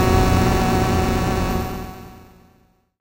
PPG 011 Dissonant Organ Chord C1
This sample is part of the "PPG
MULTISAMPLE 011 Dissonant Organ Chord" sample pack. It is a dissonant
chord with both low and high frequency pitches suitable for
experimental music. In the sample pack there are 16 samples evenly
spread across 5 octaves (C1 till C6). The note in the sample name (C, E
or G#) does not indicate the pitch of the sound but the key on my
keyboard. The sound was created on the PPG VSTi. After that normalising and fades where applied within Cubase SX.
chord, multisample, dissonant, ppg